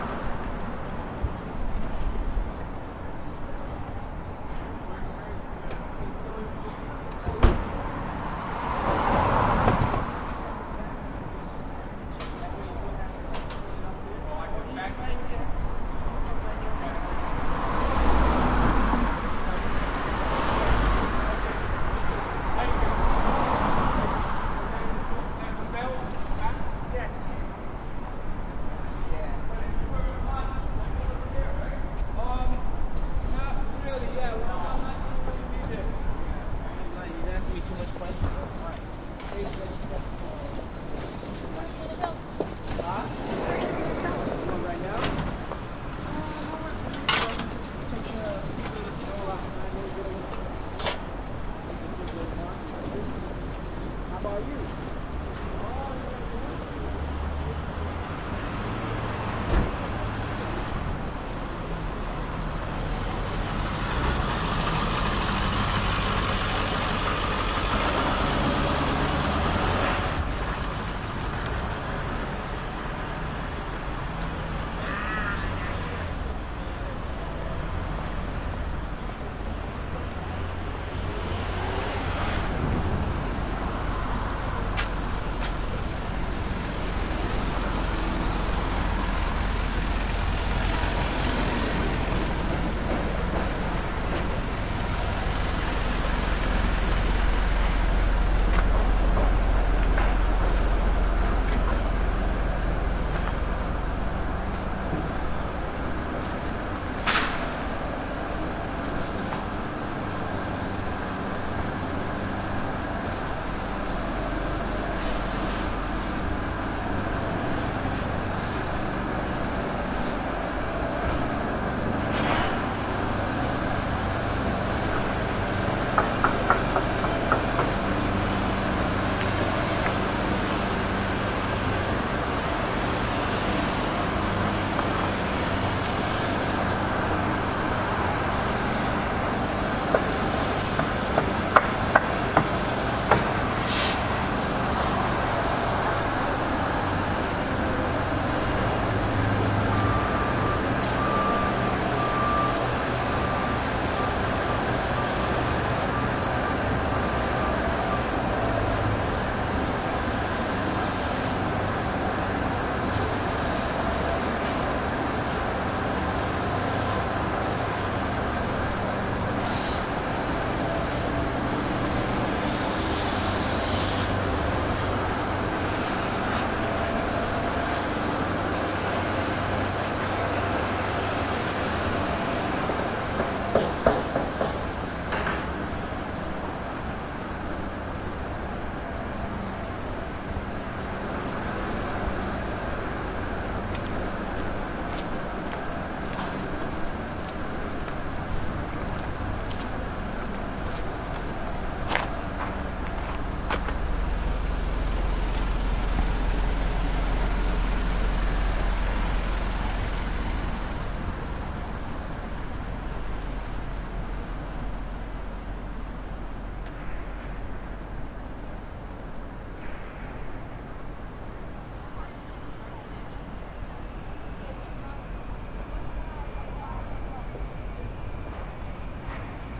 Bloor St Construction
st, traffic, construction, toronto, sidewalk, bloor